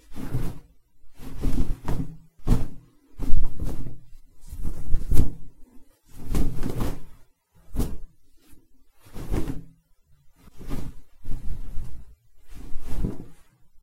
cloth flaps 2
cloth, fabric, flap, swish, whip, wind